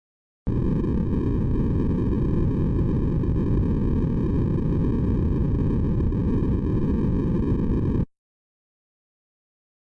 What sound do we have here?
White Noise, Low Colour, A
A very easy to produce effect created using the 'Massive' synth, whereby white noise is played with its lowest possible colour, producing this grainy and earth-like rumble. Could be used to create earthquake-like sounds for a retro video game.
An example of how you might credit is by putting this in the description/credits:
Originally created on 1st October 2016 using the "Massive" synthesizer and Cubase.
colour, white, noise, color, low, synthesizer, synth